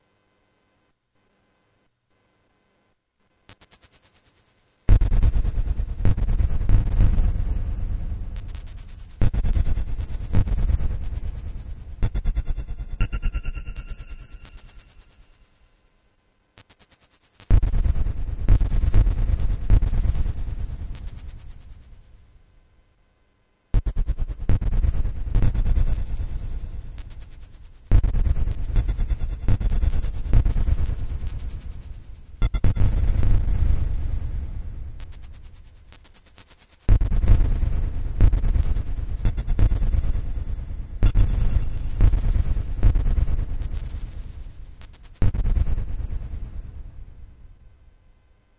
Photon guns2
You are in a spacecraft that defends itself by means of photon guns. You can hear the firing of guns with various sound, depending from where in the large spacecraft.
aliens, photons, Space, spacewar, weapons